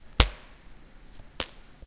016 folder elastic band
This noise is a recording of the sound you make when you open a folder with elastic bands in a corridor from tallers from UPF campus in Barcelona.
It was recorded using a Zoom H4 and it was edited with a fade in and out effect.